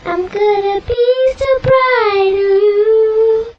Recorded direct to record producer with cheap dynamic radio shack mic. Noise reduction applied in Cool Edit 96. Still noisy put the mic away...
voice, girl